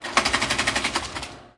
Turning off a 16mm projector - Brand: Eiki
Apagado de proyector de 16mm - Marca: Eiki